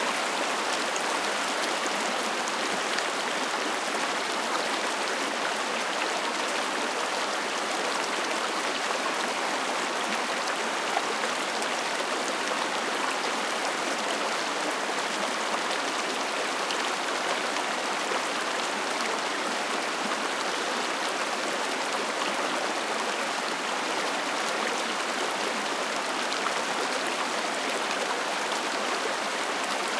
Creek in Glacier Park, Montana, USA
nature, field-recording, water